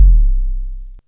kick boom1
I recorded these sounds with my Korg Monotribe. I found it can produce some seriously awesome percussion sounds, most cool of them being kick drums.
bd, boom, drum, low, monotribe, percussion